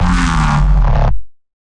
Used with my Mic on Full Volume with "No Distortion units" This happens so when you put your voice in the mic real close or have a poor mic to do this trick.